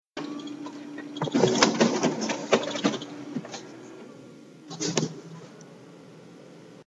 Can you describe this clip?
A copier copying paper.
Foley, Sound, Copier, Copying, Paper